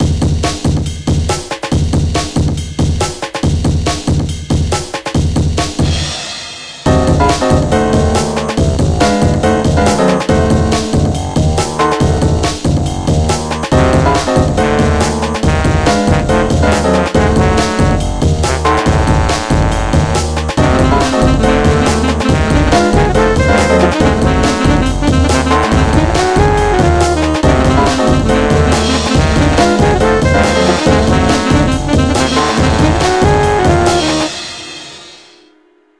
Dark Jazz Pattern
korgGadget, diminish, C, 140bpm